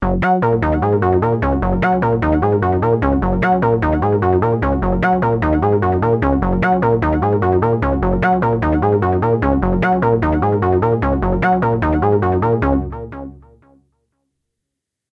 digibass loop arpeggio 150bpm
reminds me of something by Dr Alex Patterson. ambient ahoy.